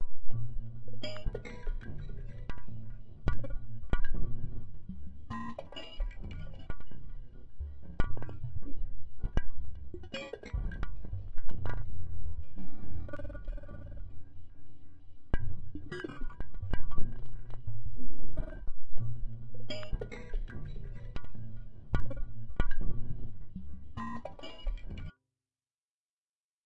doggy glitch6
lowercase minimalism quiet sounds
lowercase, minimalism, quiet, sounds